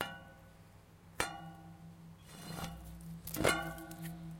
Metal grid being hit MORPHAGENE
A metal grid on the ground being touched and hit. un-normalized version
ambient, metal, morphagene